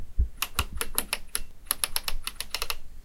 Dial Switch - 2
Switches being toggled and pressed in various ways
band, button, control, controller, dial, leaver, switch, toggle, trigger